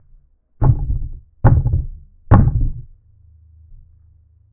This is the sound of a door being pounded on far away or in another room. Could be good for a horror or thriller